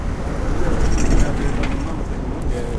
City Sidewalk Noise with Chain
train, nyc, field-recording, sidewalk, new-york, city, public